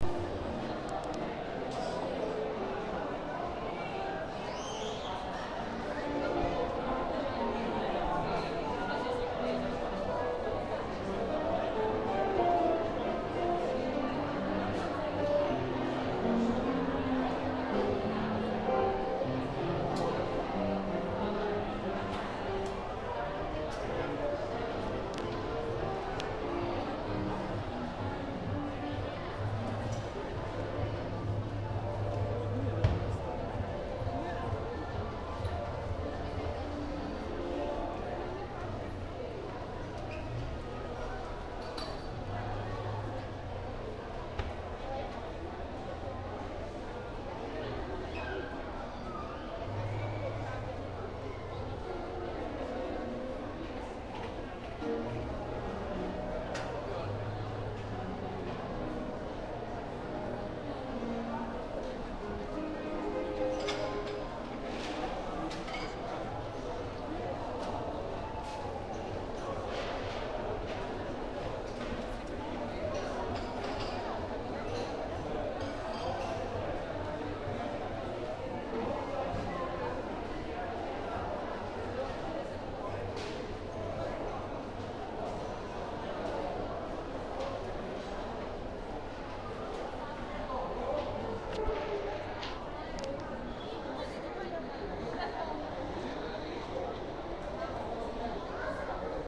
walking on the piano floor at mall.